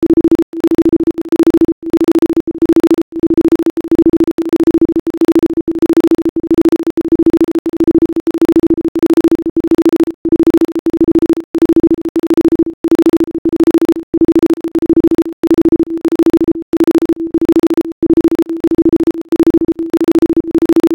A volume oscillation.